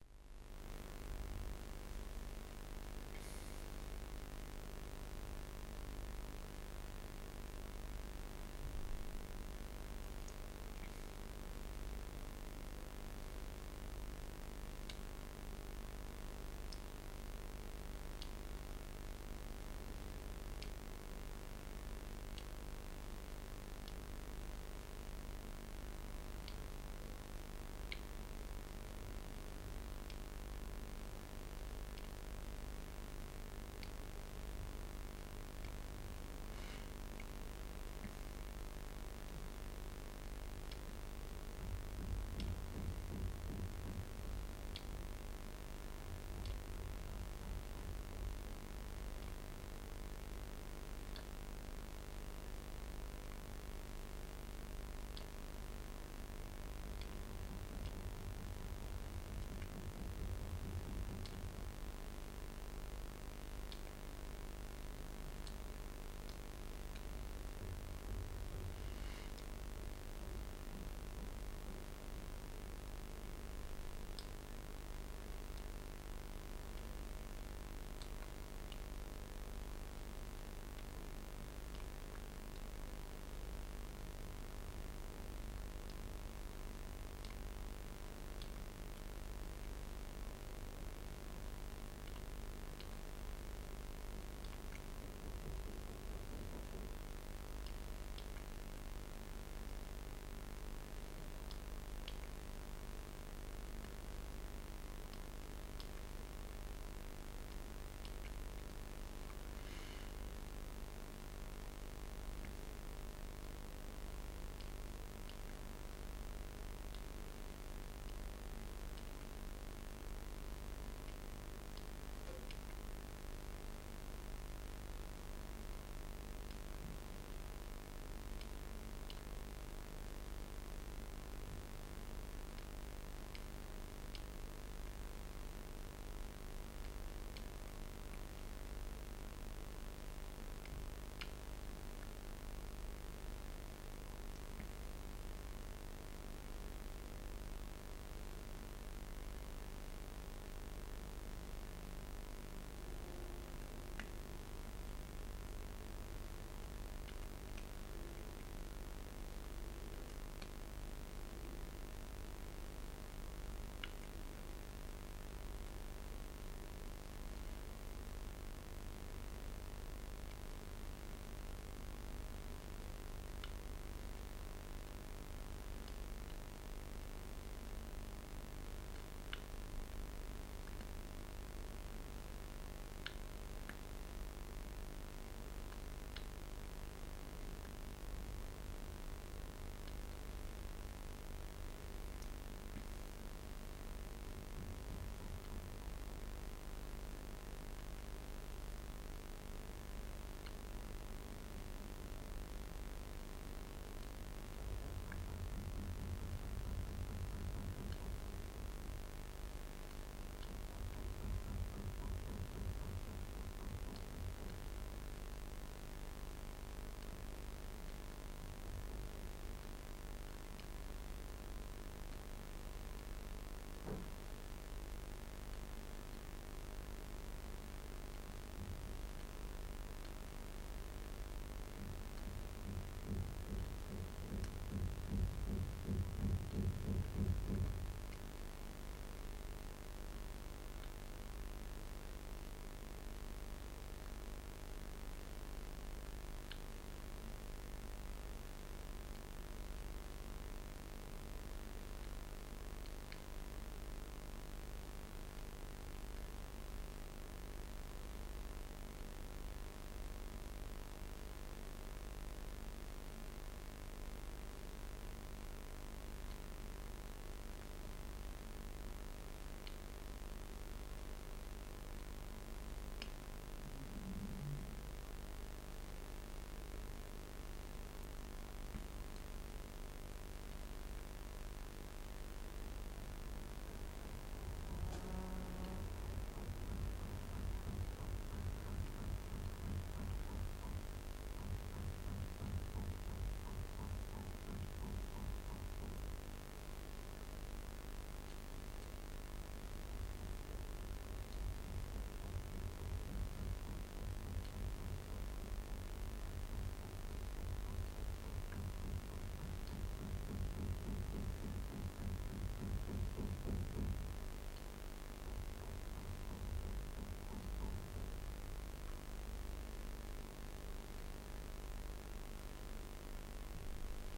ECU-(A-XX)200 phase1
ECU, 16V, Channel, T2, T1xorT2, MCV, ATV, SOx, Dual, UTV, NOx, Iso, PCM, Wideband, Trail, ECM, Lens, Fraser, Carb, Broadband, Link, Jitter, Optical, Path, Battery, Synchronous, Reluctor, Atmospheric, Beam, COx